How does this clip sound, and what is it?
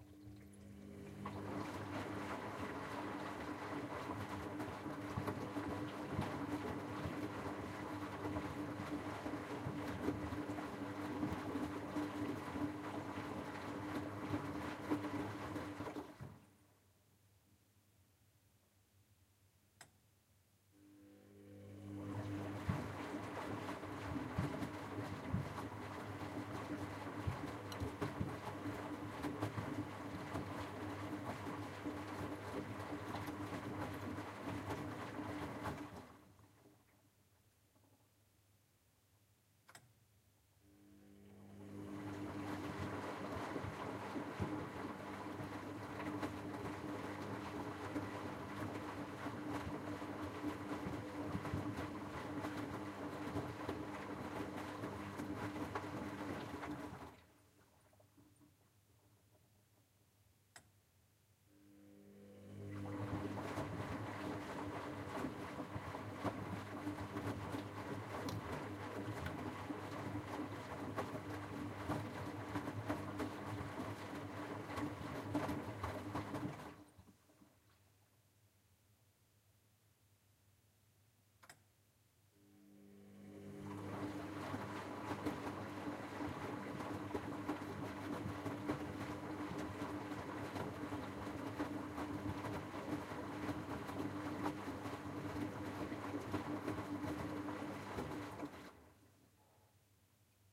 washing machine washing 1
machine, washing, 1